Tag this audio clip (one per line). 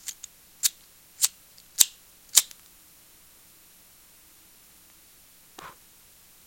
blowing
fire
lighter